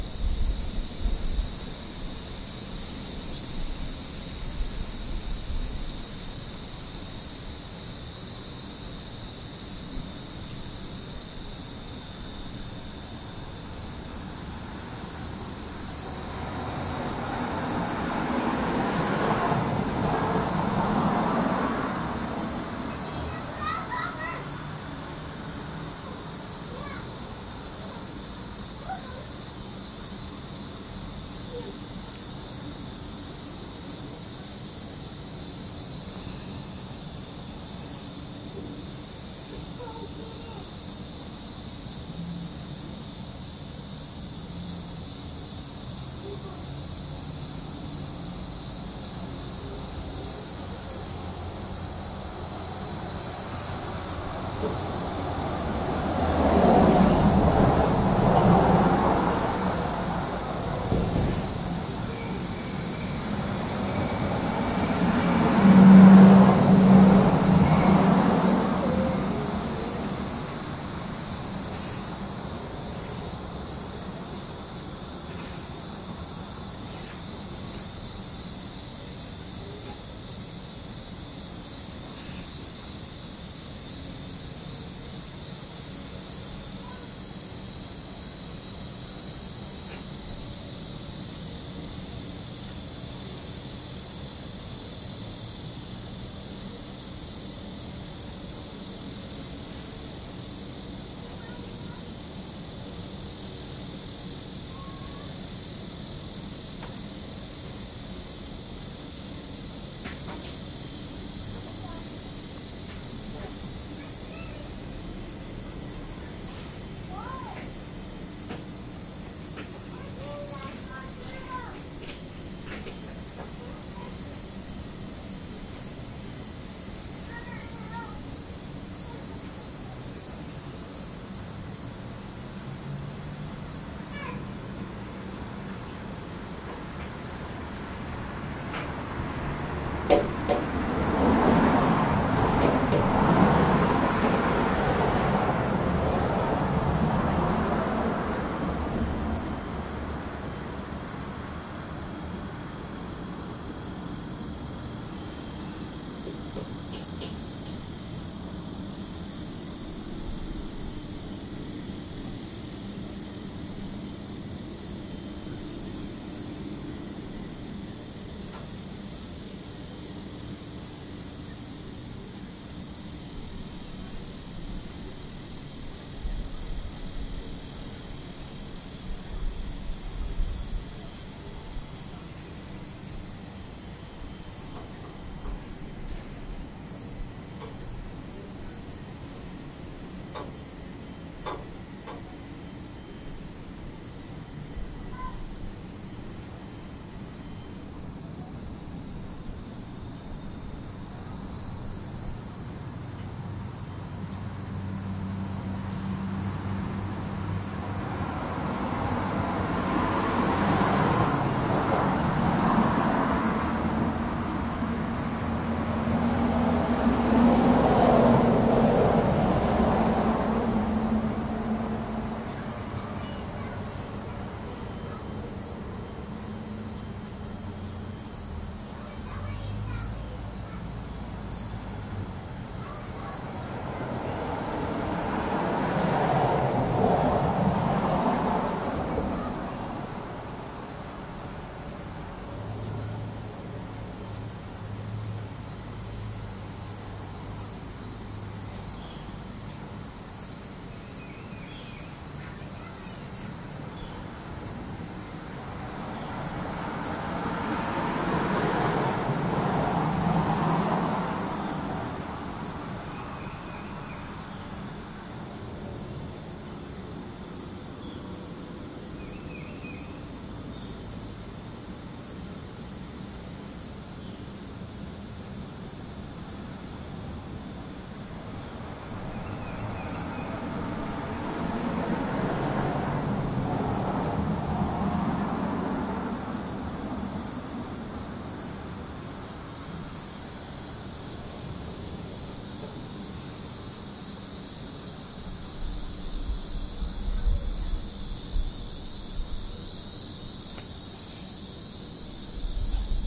Recorded with Zoom IQ7 and IPhone SE2020 and then trimmed and volume adjusted from larger file with Goldwave on the PC. On a lazy breezy Saturday on the front porch in Atchison KS. Neighbor kids from the nextdoor apartment play in their entryway. Bugs, crickets and anual cicadas active during most of the day are heard, along with some birds toward the end. A few cars go by on what is a pretty busy street during the week. Occasionally the thin-walled square metal down-spout bangs the porch rail near me in a wind that occasionally comes gusting around the house.